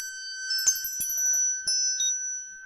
Bell Blip Loop
Blippy circuit sound.
strange, sleep-drone, circuitry, circuit, noise, glitch, idm, tweak, bend, squeaky, bent, bending